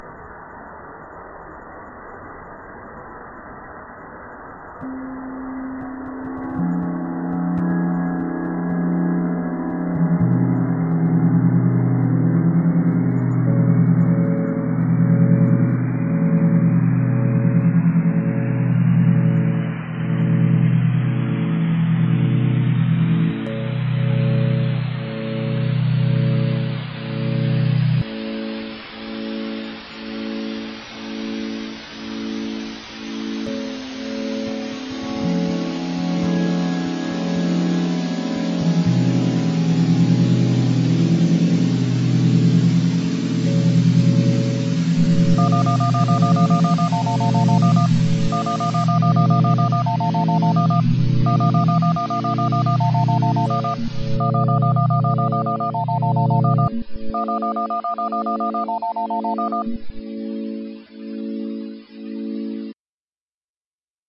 All of the parts were generated in Audition. 232.4 bpm.
storm, chaos, chaotic, dtmf, telephone